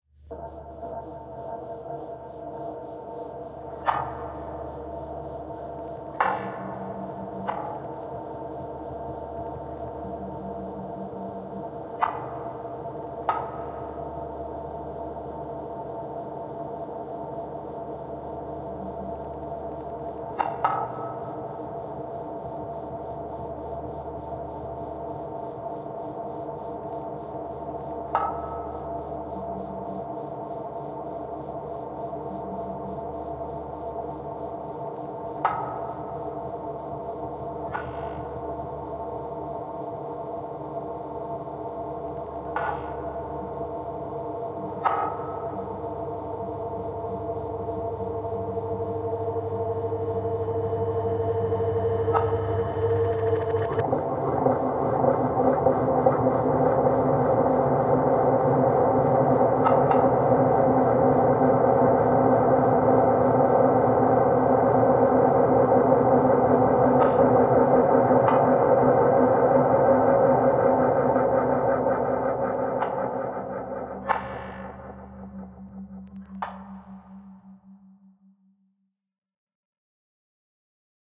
Creative Sounddesigns and Soundscapes made of my own Samples.
Sounds were manipulated and combined in very different ways.
Enjoy :)
Ambient, Artificial, Atmospheric, Drone, FX, Sci-Fi, Sounddesign, Sound-Effect, Soundscape, Space